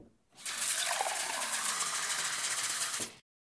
Filling a glass with water
I´m filling a glass of water in my kitchen
recorded with my Zoom H4n pro and edited by wave lab
field-recording, flow, glass, h4n, stream, thirsty, water